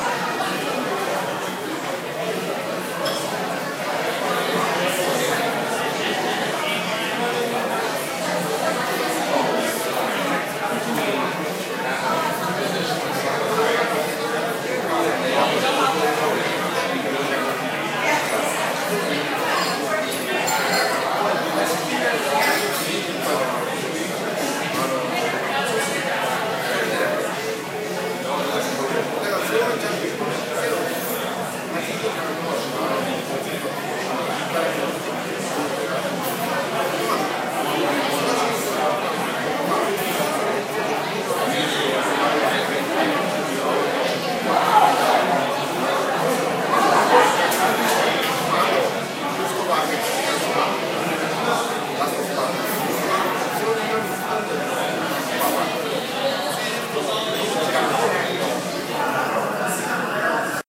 Recorded in a restaurant called The Counter. I recorded this with my iPhone using Voice Memos.